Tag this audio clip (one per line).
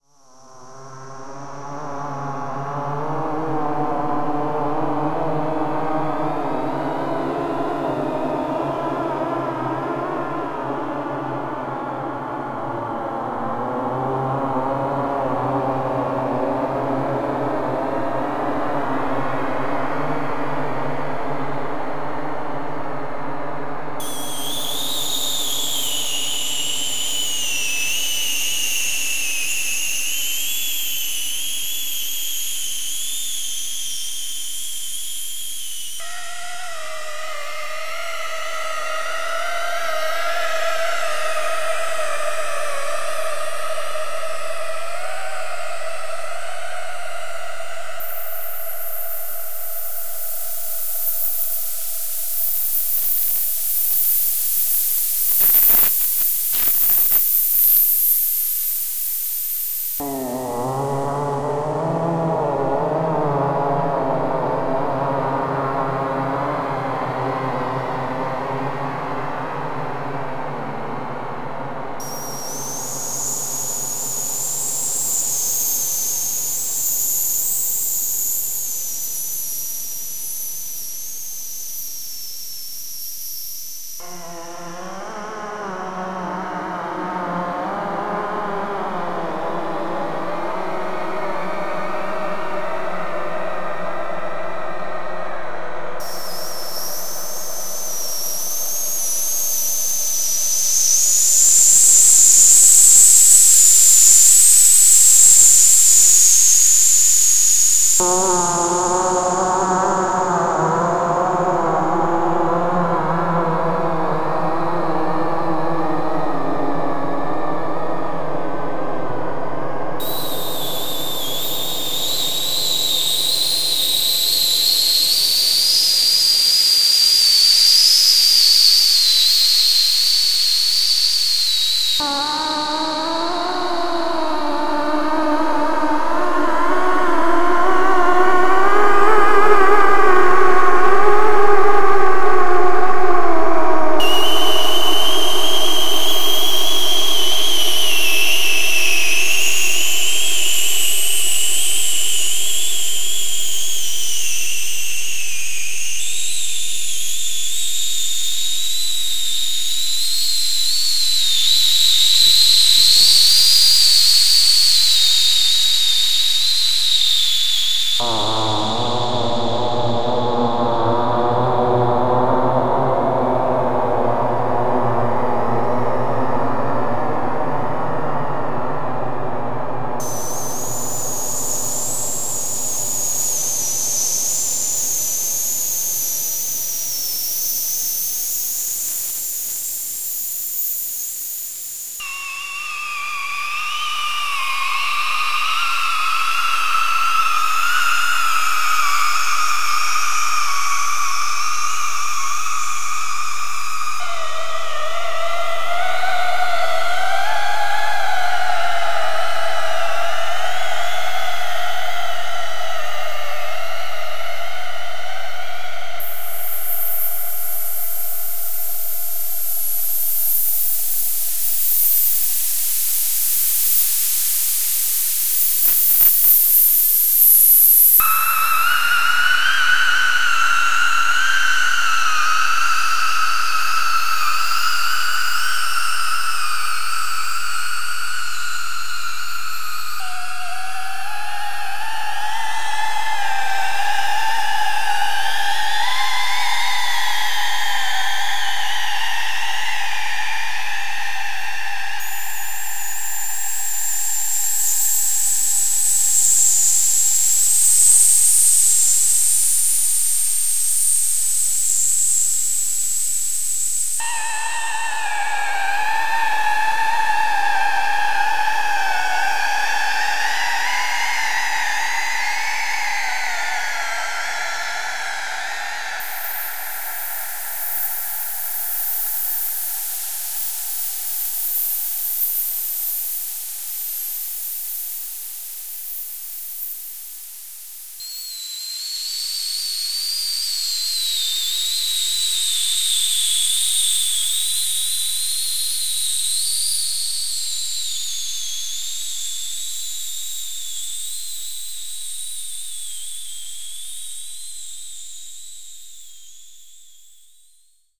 soundscape
space
ambient
drone
reaktor